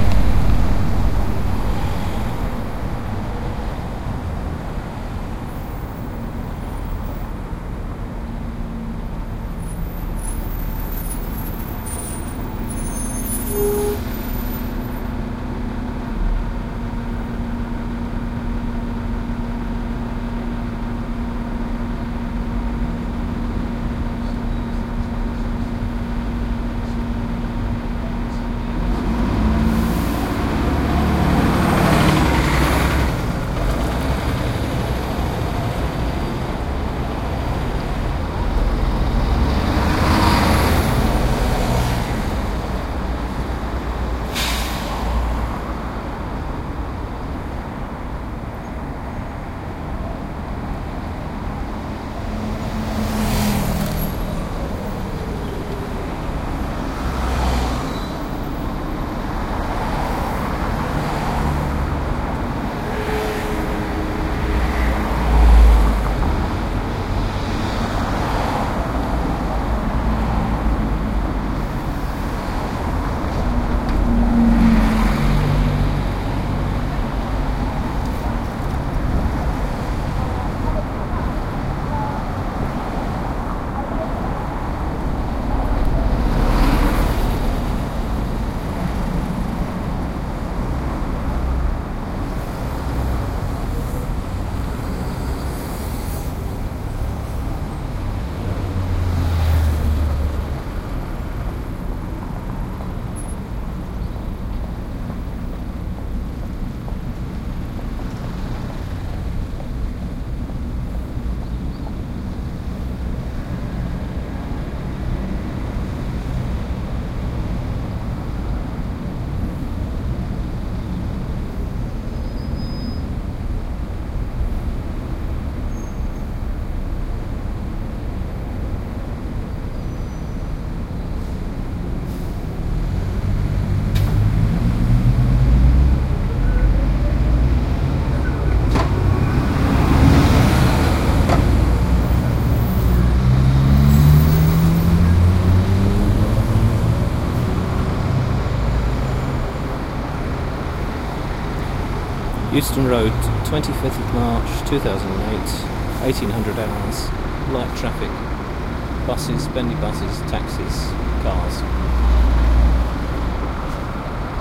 Traffic recorded 1 metre from traffic lights o/s Euston Station, Euston Rd, London, Uk. 1750 hours, March 2008. Edirol R1 using internal microphones. Normalised in Adobe Audition. Stereo. NOTE location voice tag on end of recording